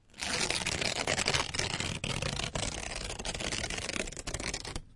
rustle.paper Tear 2
recordings of various rustling sounds with a stereo Audio Technica 853A